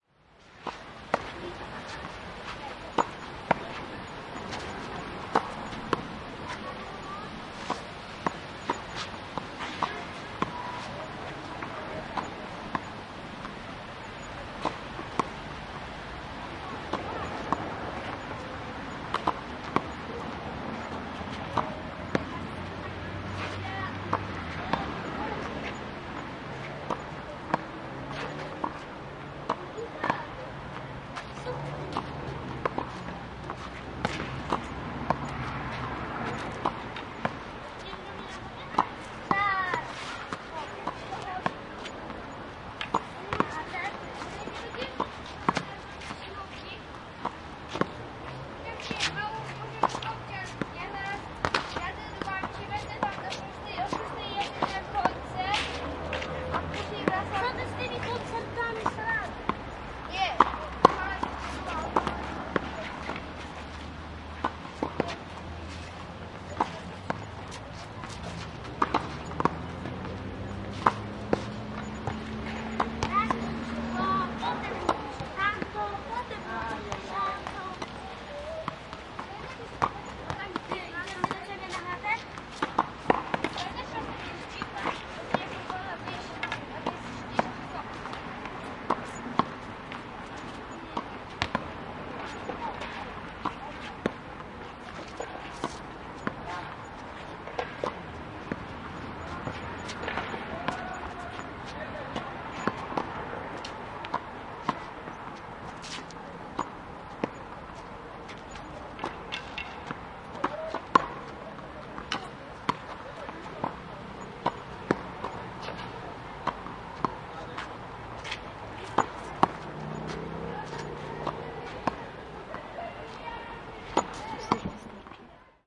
hi-fi szczepin 01092013 squash on lubinska street
01.09.2013: fieldrecording made during Hi-fi Szczepin. performative sound workshop which I conducted for Contemporary Museum in Wroclaw (Poland). Sound of squash game in Szczepin recreation Center on Lubinska street. Recording made by one of workshop participant.
marantz pdm661mkII + shure vp88
Szczepin, squash, Wroclaw, sport, Poland, field-recording